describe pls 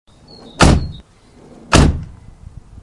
Car Door, Closing, A
Two raw recordings of a car door being shut.
An example of how you might credit is by putting this in the description/credits:
Car, Door, Shut, Slam